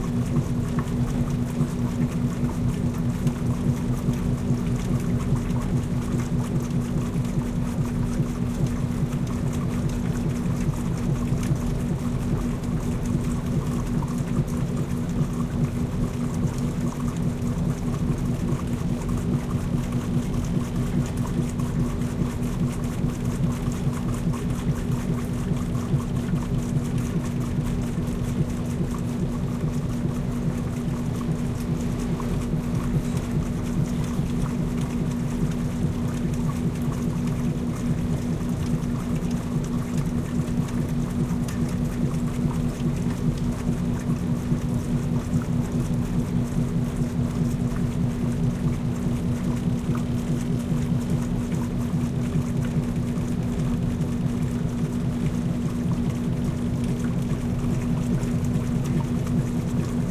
laundromat washers washing machines close wash1
machines, close, washers